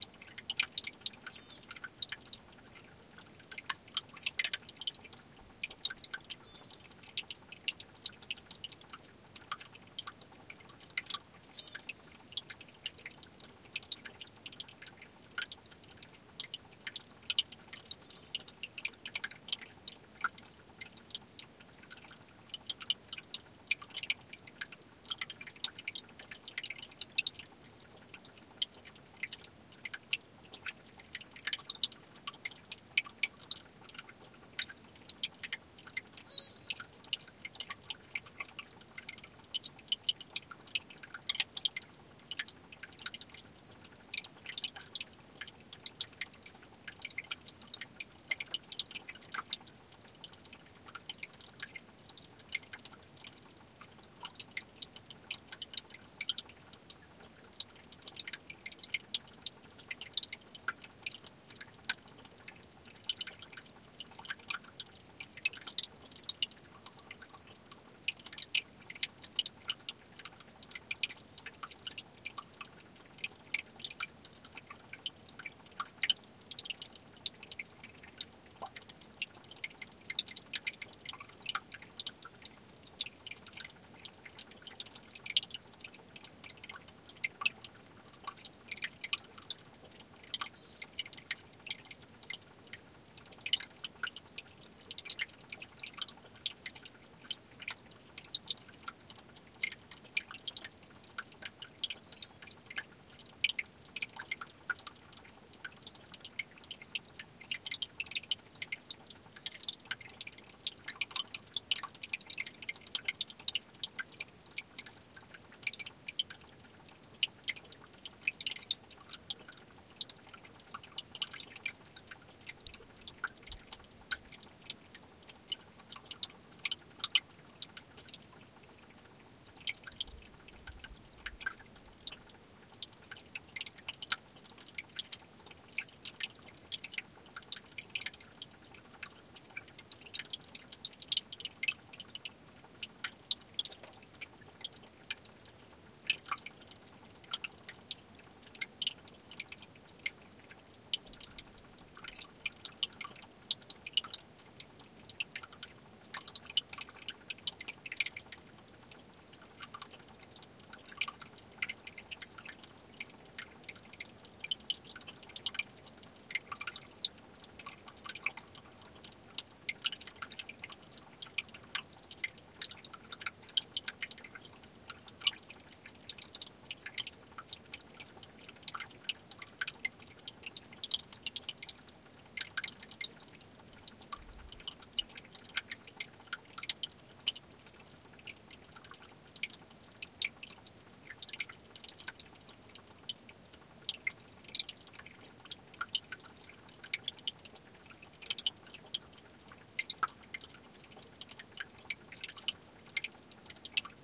Water trickling beneath a field of boulders.
flow, gurgle, liquid, trickle